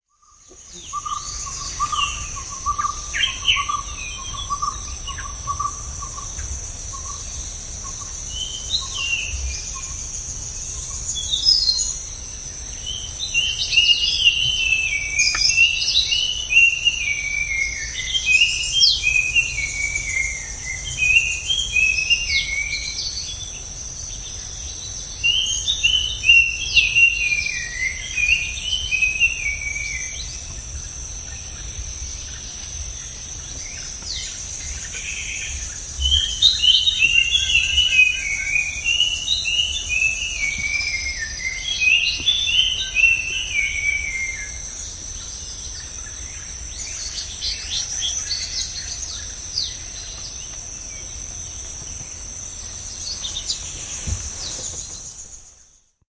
Jungle ambience. Nagarhole Wildlife Sanctuary.
I recorded this sound at the Nagarhole Tiger Reserve in Karnataka, India. The sound is recorded on my iPhone 12 Pro using the Dolby On app. There are unfortunately some minor unwanted sounds in the recording caused by things like someone moving in their seat. But it’s a nice ambient recording to use if you are able to edit around it. The recording was done at 7:30am.
exterior, Jungle, insects, wildlife, crickets, field-recording, ambience, birds, chirping, forest